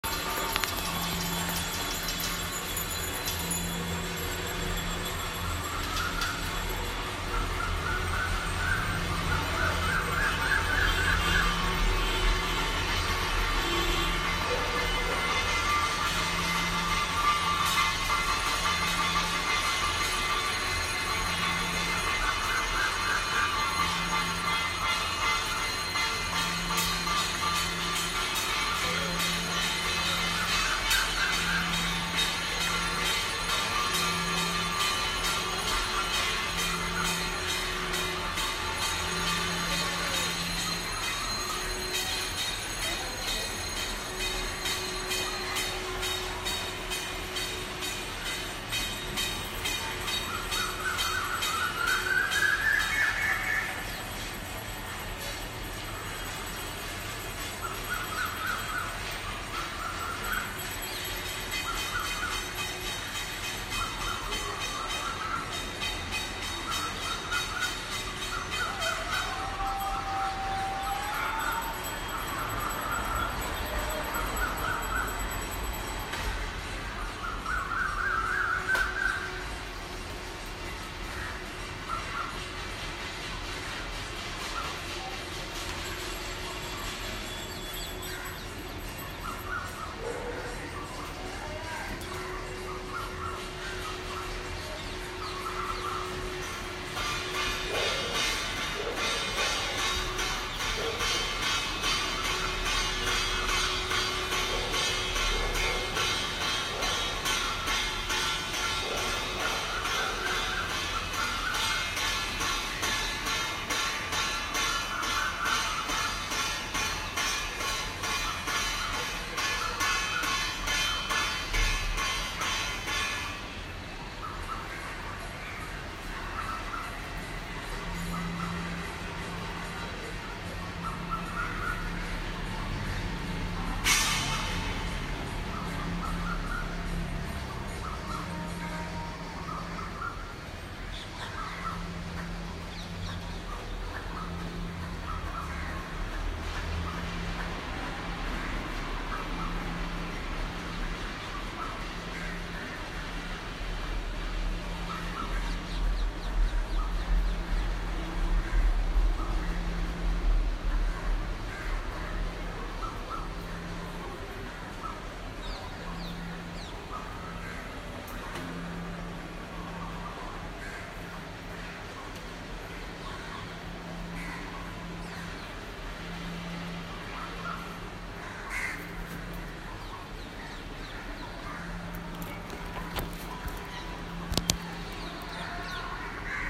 A field recording then from my balcony during the thali banging and clapping that happened in Mumbai during the Janata curfew due to the corona virus.
Metallic, lockdown, curfew, bells